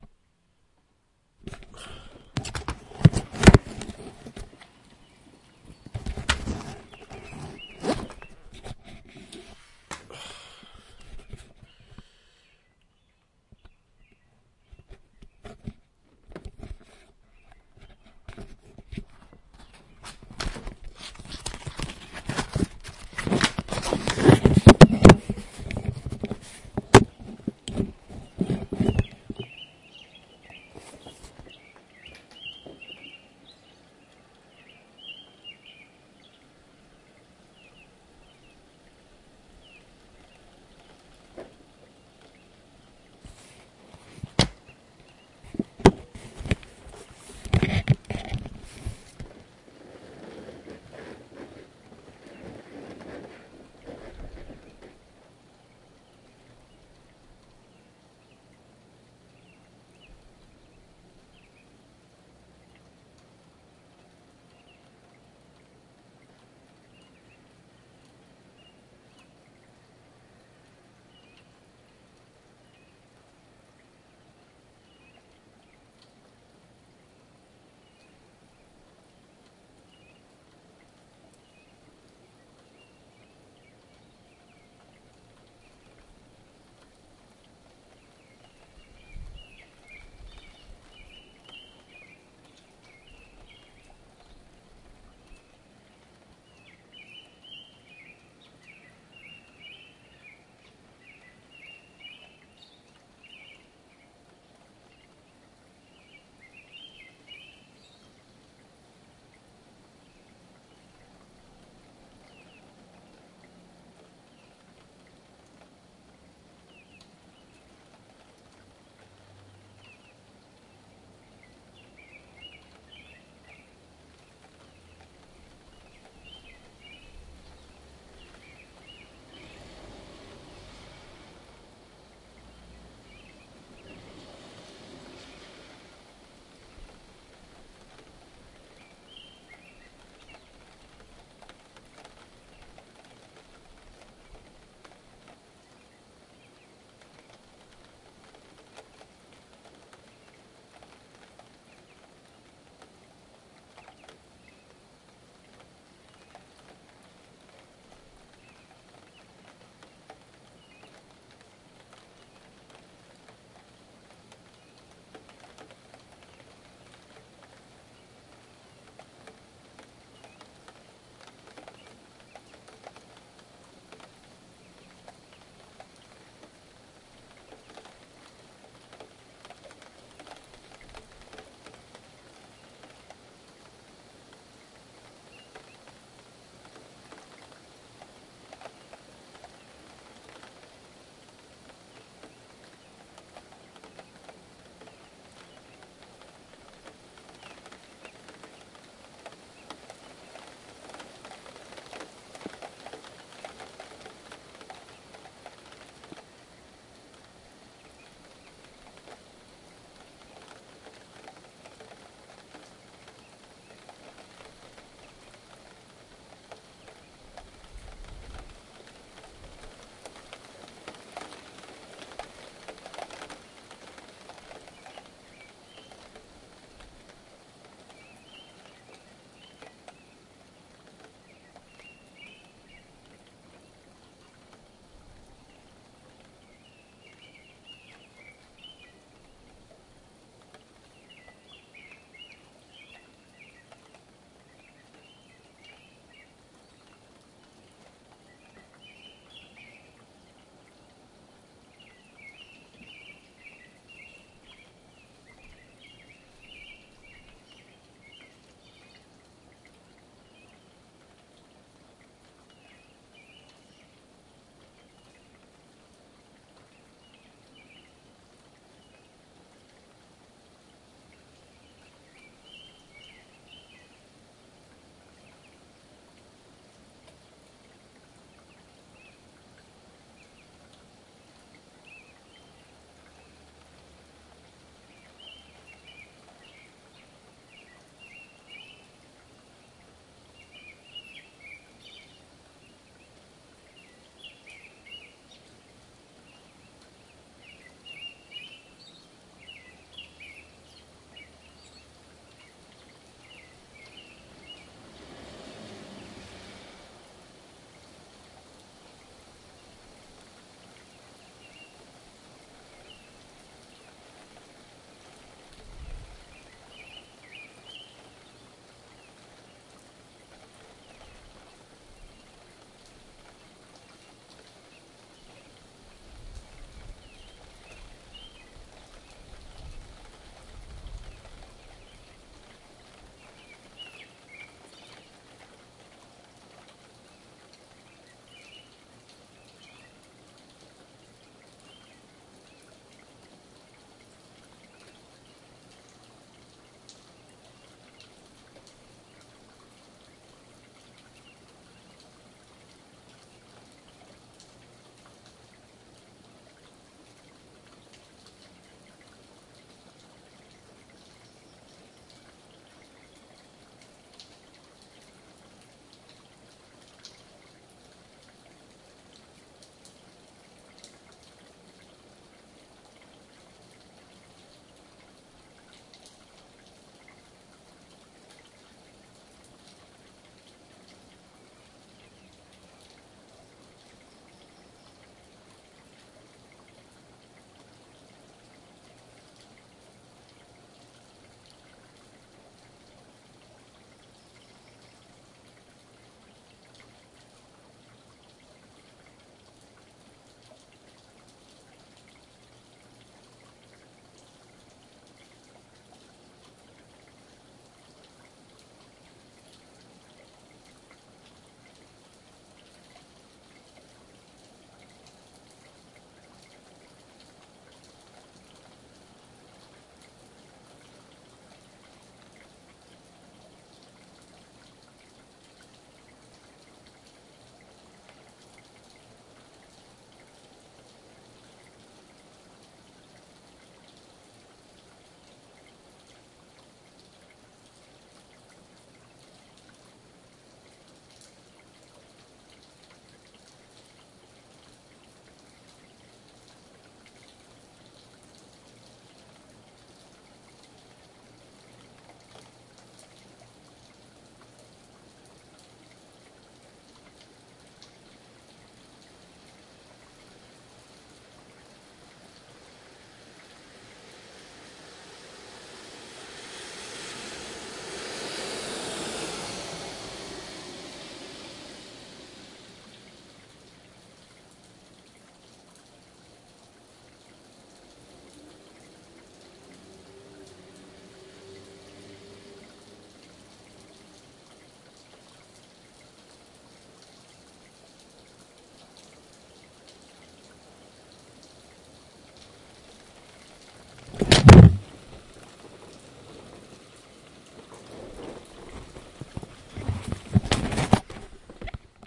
A recording I made of birds outside my window, at about 5:30 sometime in late May, 2013. Excuse the noises at the beginning... Was kind of groggy :)
Ambient, Bird, Birds, Calls, Halifax, Nature, Nova, Scotia